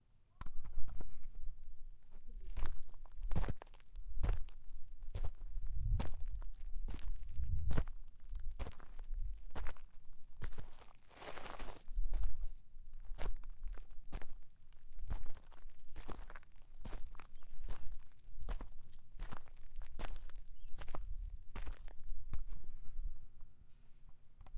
Gravel/Sand Walking 2
Walking on sand/gravel on concrete. Very crunchy. Recorded on a DR07 mkII in Southwest Florida. Some wind noise with crickets in the background.
If you can, please share the project you used this in.
summer, ambient